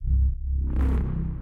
MS - Neuro 007
my own bass samples.